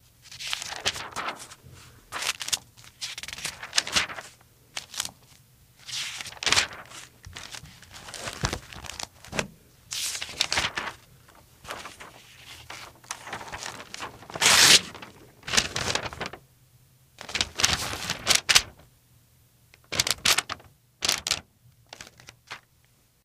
hojas de papel pasando. Sheets